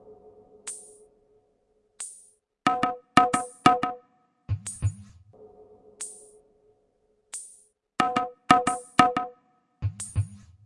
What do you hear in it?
Ambient Groove 018
Produced for ambient music and world beats. Perfect for a foundation beat.
drum, ambient, loops, groove